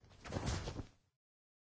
Body Hits Floor Dull reverb
Ball fall mixed with impact sounds and clothing sounds
Floor, Field-recording, Dull, reverb, Body